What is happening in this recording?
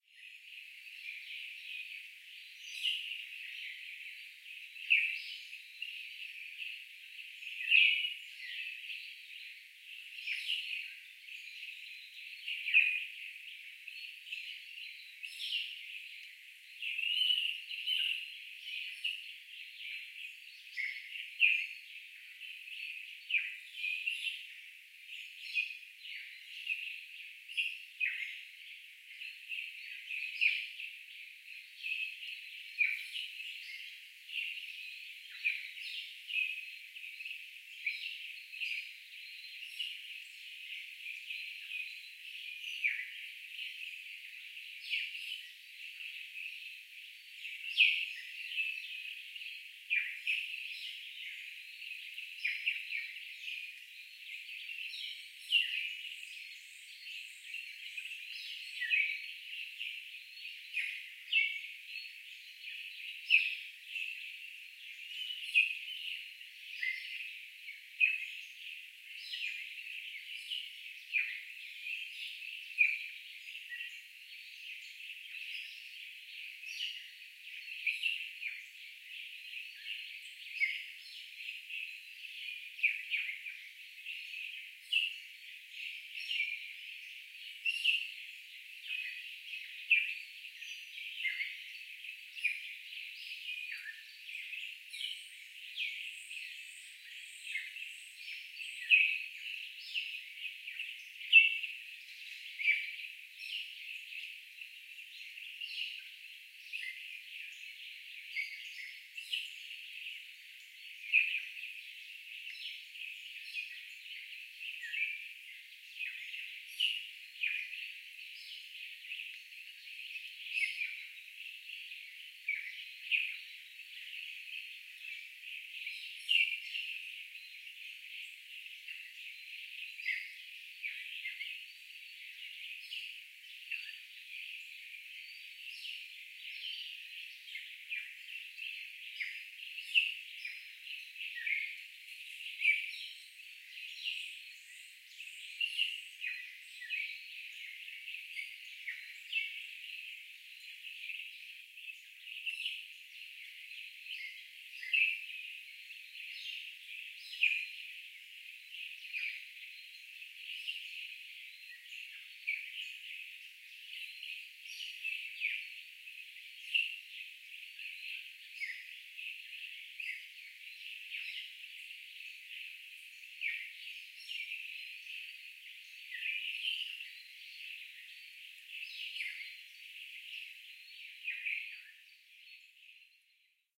Arrival Forest small
The rain forest is located at the surroundings of Liamuiga Volcano
field-recording, kitts, volcano, st, rain-forest, island